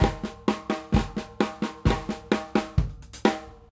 Acoustic drumloop recorded at 130bpm with the h4n handy recorder as overhead and a homemade kick mic.
drumloop, acoustic, drums, h4n, loop